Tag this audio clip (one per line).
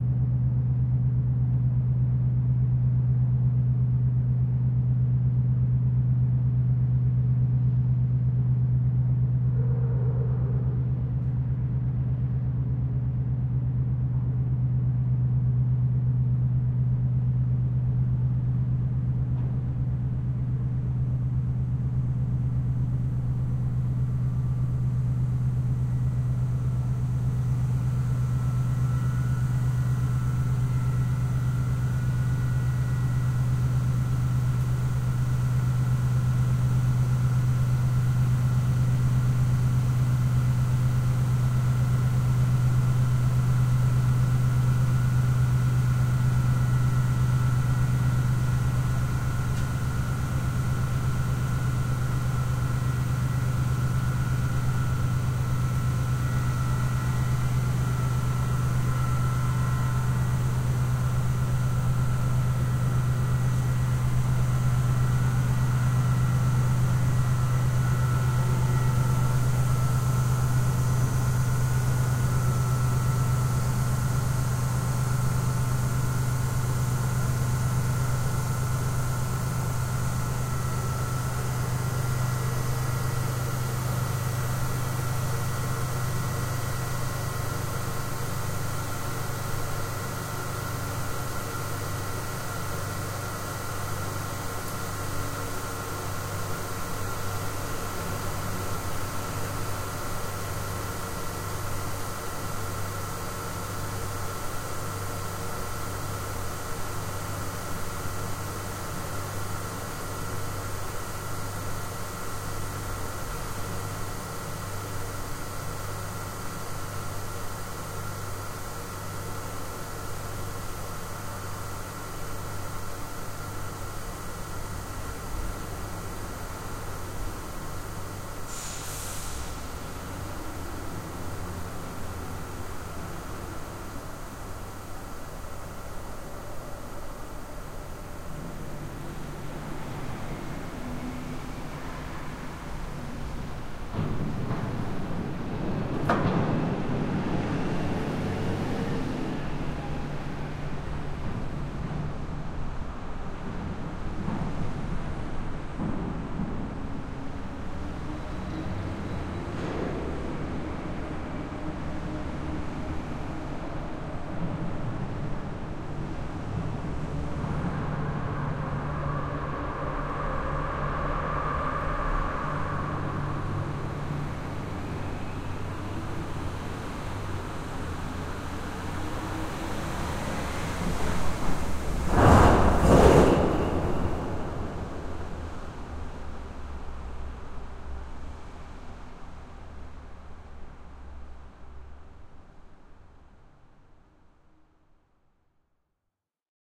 cargo
deck
carrier
ship
industrial
engine
loading
harbour